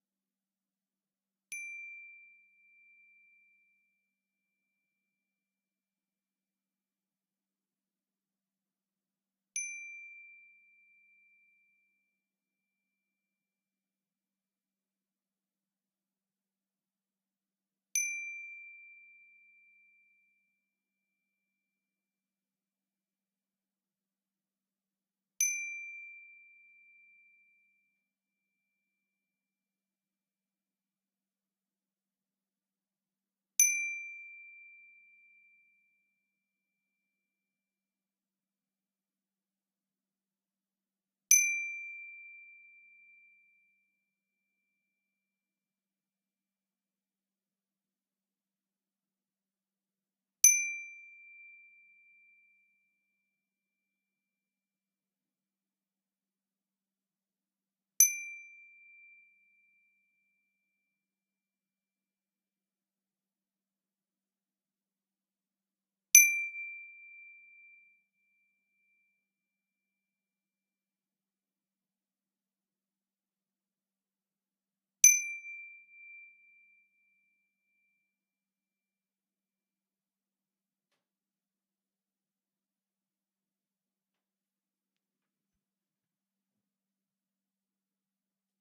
Pipe-chimes-D6-raw
Samples takes from chimes made by cutting a galvanized steel pipe into specific lengths, each hung by a nylon string. Chimes were played by striking with a large steel nail.